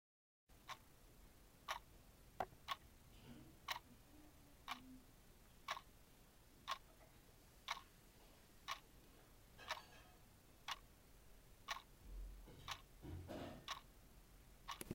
Sound of typical clock.
Tic-tac, Watch, Clock